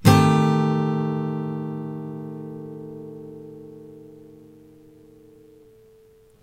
yamah Cm6
Yamaha acoustic guitar strummed with metal pick into B1.
acoustic, chord, guitar, c, amaha, 6th, minor